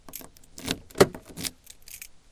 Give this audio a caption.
Unlocking a Volvo 740 from the outside